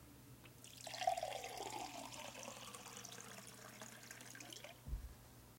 pouring water into glass
Recorded using a Canon GL1 and an Audiotechnica shotgun mic
(unsure of model number, a cheap one most likely). Water being poured
into a glass. Audio is low so gain might need to be adjusted.